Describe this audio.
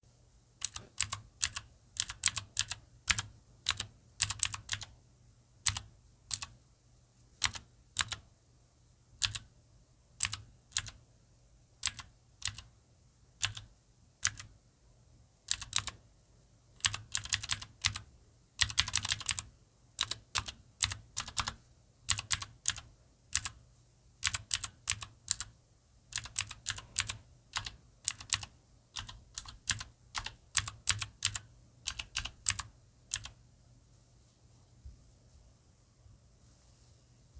Mechanical Keyboard Typing Cherry Blue Switches
This is pressing keys on my HyperX FPS keyboard with cherry blue mechanical switches. Recorded with a lapel microphone and a phone.